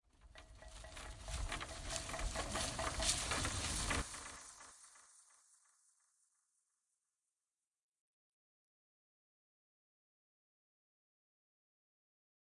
Chain mixdown
Chain Rattling, Very Metallic